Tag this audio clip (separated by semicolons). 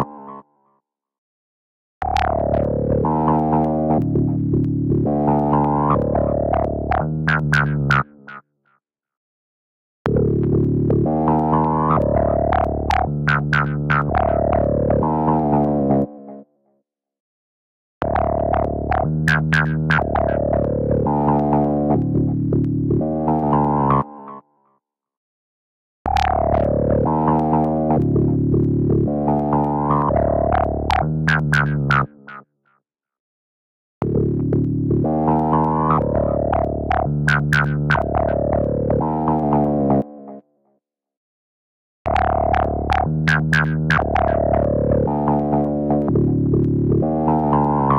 120BPM; Novakill; VST; dare-39; electronic; loop; moody; music; nitrous; synth; wierd